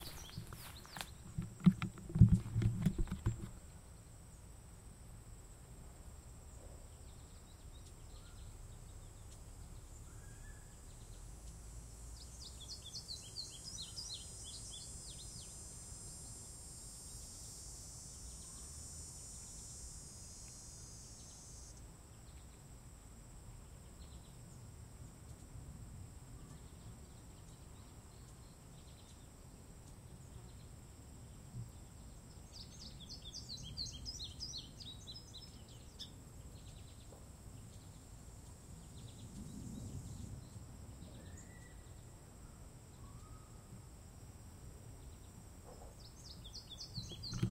Crickets, Birds, Summer Ambient
This was taken in a grassy meadow close surrounded by town houses
Crickets, Summer, Birds